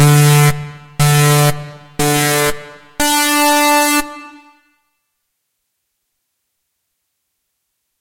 Race Countdown
timer, counting, synth, start, effect, race, racing, countdown